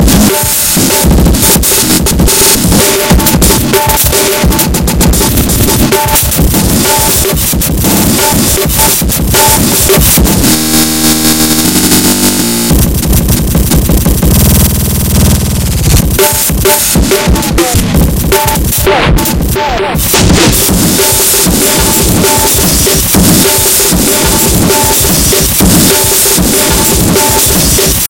Ina Dashcraft Stylee
break, breakcore, dashcraft, drill, hardcore, hradcroe, killer, nastttt